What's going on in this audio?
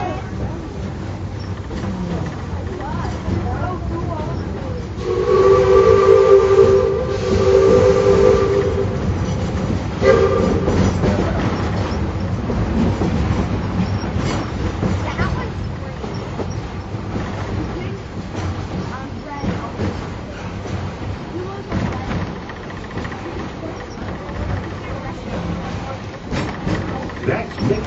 A Train at a theme Park tooting along.